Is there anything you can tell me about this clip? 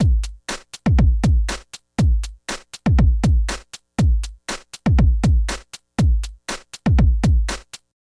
First, most basic beat of four variations. Recorded on iMaschine at 120 BPM for four bars.